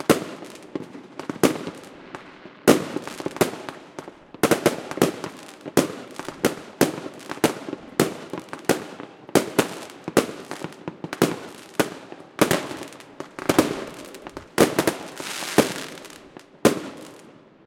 Fireworks in foreground
New year fireworks
explosion, firework, fire-works, fireworks, foreground, new, rocket, rockets, year